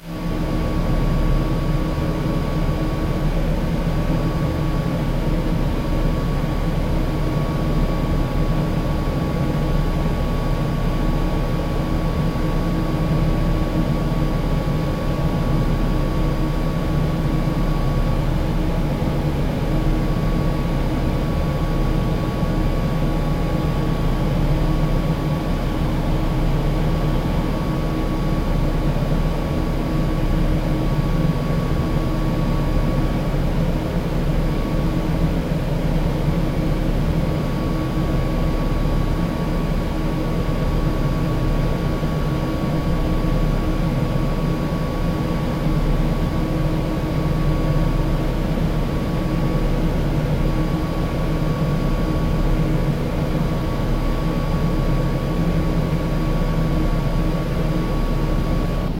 Turbine Room
This is the sound of my Sony Vaio's cooling fan. Just my Logitech USB mic turned around and pointed directly back at the computer, nothing else happening in the room. Then I ran it through Audacity. The basic track got a good dose of GVerb. This was the first of three tracks, and I gave it about 7/8s of full gain. Then I lowered the pitch almost all the way to the bottom and added that as a second track. I turned the gain up to the max on this track. Then I took another copy and shifted the pitch almost all the way up to give it a touch of electrical buzz, and added it as the third track, with the gain turned down a bit compared to the other two. The result is a lowly computer cooling fan pumped up until it becomes a big industrial fan or turbine.
fan, hum, industrial, industry, mechanical, turbine, whoosh